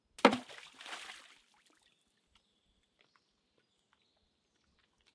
Stone On Water 02
water, Waves, Nature, Rock